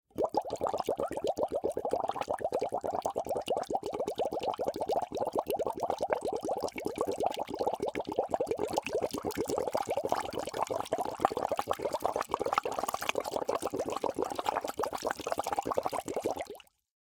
Blowing through a straw into a bottle of water.